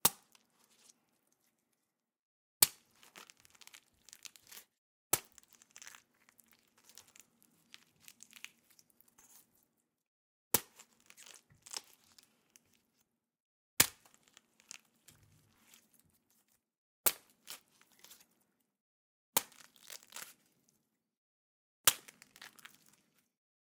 Impacts Splatter Pineapple 002
A series of slushy, splattery impacts made by punching pineapples. Great for fleshy, crunchy, disgusting moments!
bones, flesh, fruit, guts, impact, punch, slush, splat, watermelon